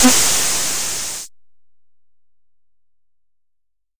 Tonic Noise Burst FX
This is a noise burst sample. It was created using the electronic VST instrument Micro Tonic from Sonic Charge. Ideal for constructing electronic drumloops...
drum, electronic